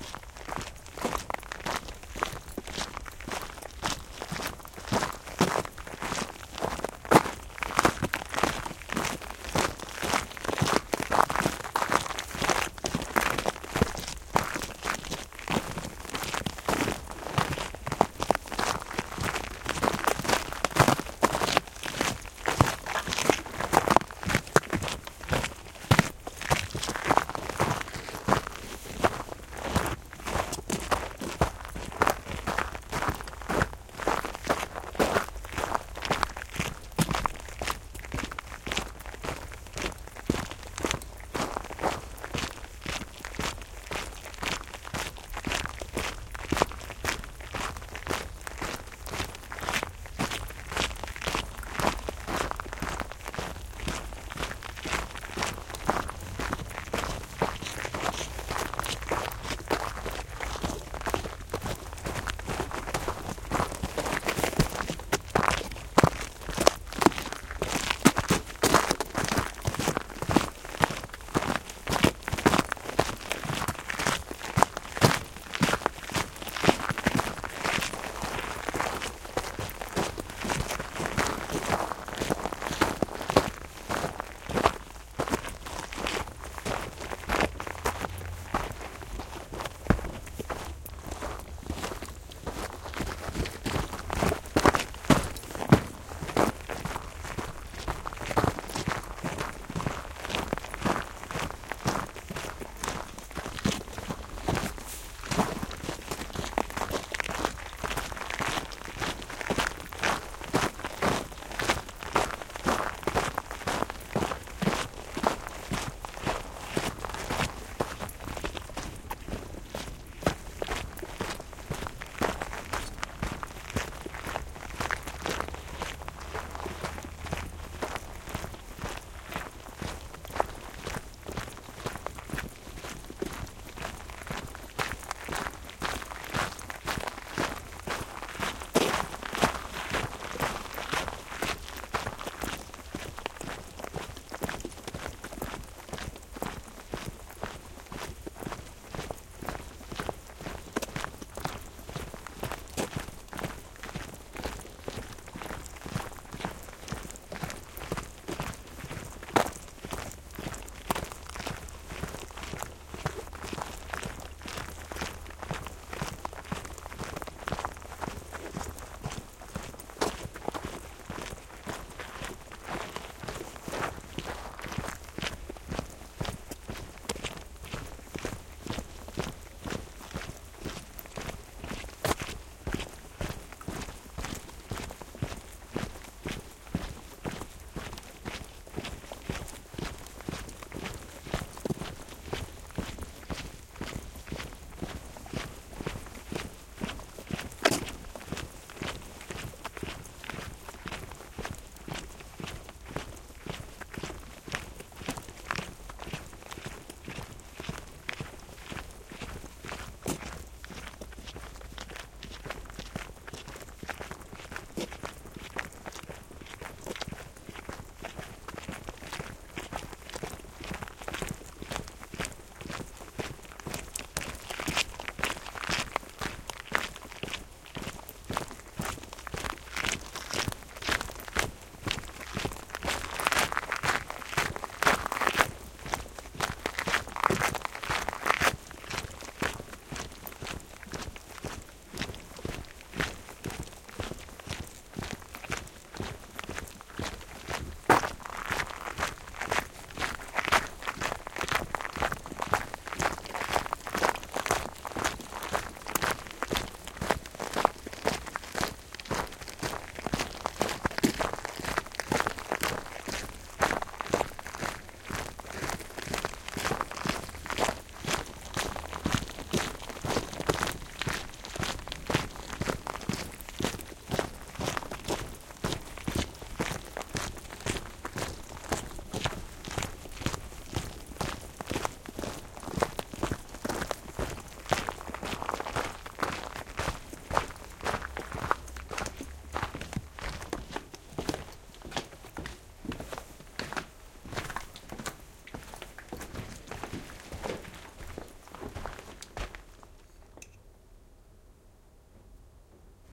Two people marching over the desert or on dirt. Some clothes rustle and YKK zippers tingling.
Recorded on a Mixpre6 with LOM Uši microphones.